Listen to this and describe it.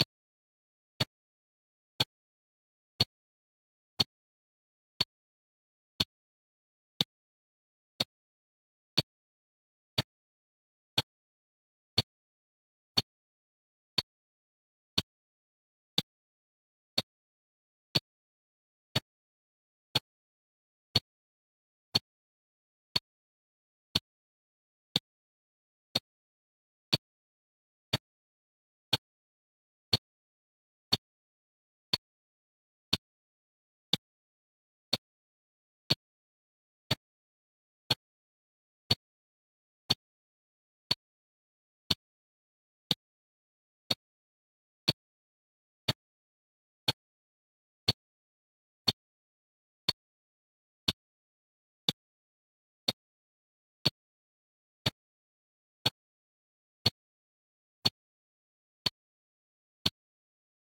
Sound of my Binger wristwatch ticking.
I used phase cancellation to get rid of the noise floor except for the ticks. At lower volumes it sounds very convincing. I draped the watch over my microphone - the Blue Yeti Pro.
clock-tick, clock-ticking, Tick, Ticking, Watch, Watch-tick, Watch-ticking